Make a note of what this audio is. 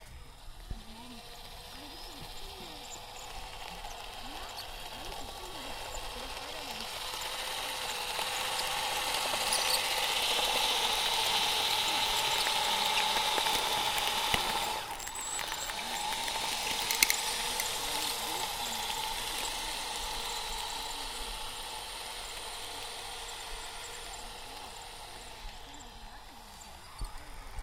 Field-recording of a remote controled miniature bulldozer scooping sand at a playground.
Recorded with Zoom H1
machine, electric, motor, controled, remote, Buldozer, engine, minuature